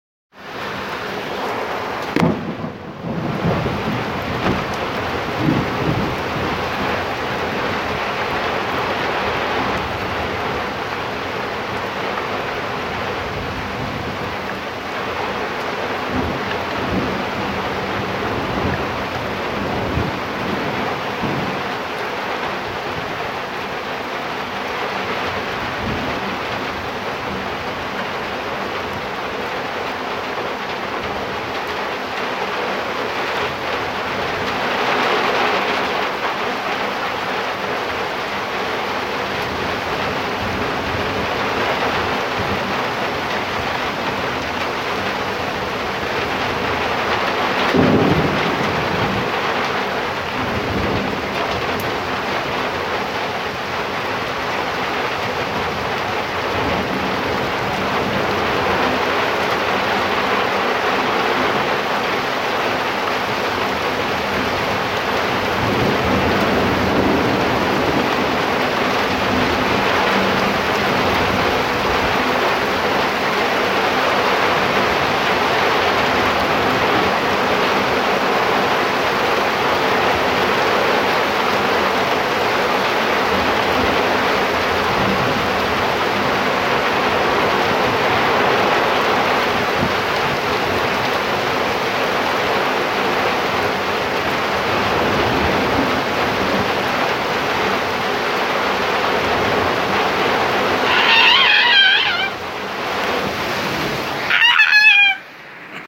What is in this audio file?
A Rainstorm i recorded Years ago.